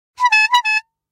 Bicycle air horn 001 (1)
Air Horn of a bicycle.
bicycle, ride